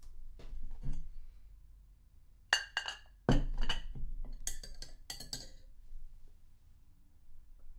Putting a cup on a table and stirring in it
Putting a plate on the table, followed by a mug. Lastly some stirring in the cup. Self-recorded.
tea, spoon, coffee, mug, stirring, table, cup, stir